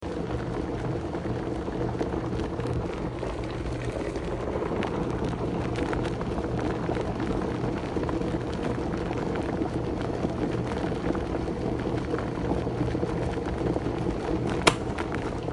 Kettle Rolling Boil
Electric kettle boiling, with the switch being turned off near the end.
rolling, coffee, simmer